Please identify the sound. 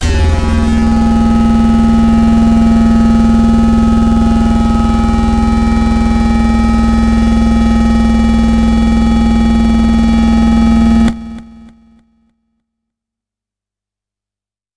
Leading Dirtyness - E0
This is a sample from my Q Rack hardware synth. It is part of the "Q multi 009: Leading Dirtyness" sample pack. The sound is on the key in the name of the file. A hard, harsh lead sound.
electronic, hard, harsh, lead, multi-sample, synth, waldorf